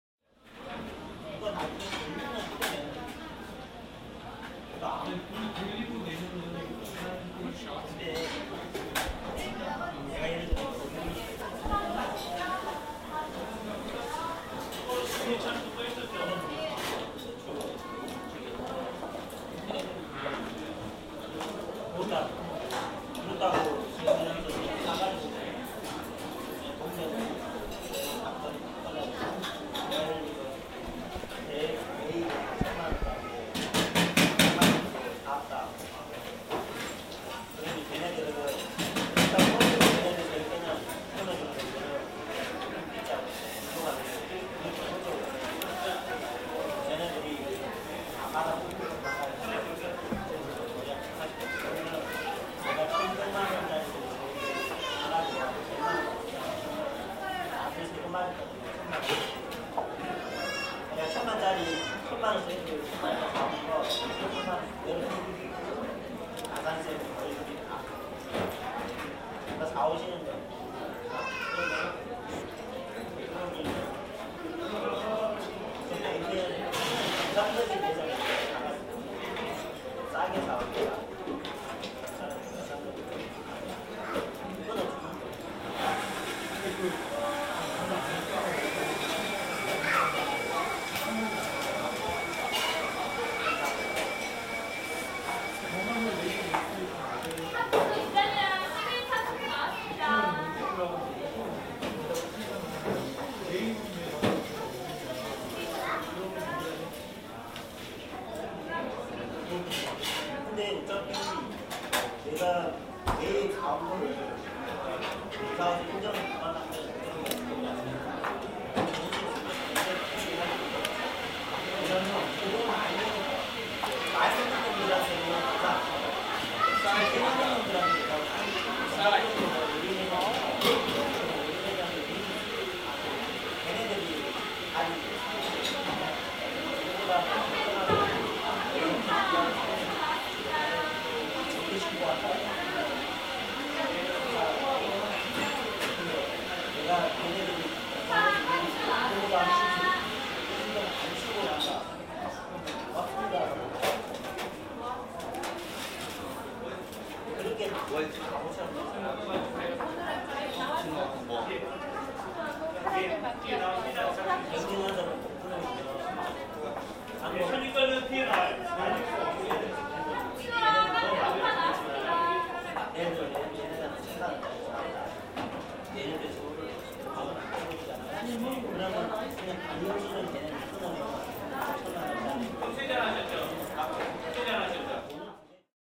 Gwangju Starbucks Ambience 2
Foley recording of ambiance in a Starbucks in Gwangju, South Korea. Mostly comprised of people talking (Korean) and normal espresso making sounds.